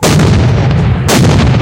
explosion big 03
Made with fireworks
bang, boom, destroy, explosion, firework, fire-works, fireworks, long, wide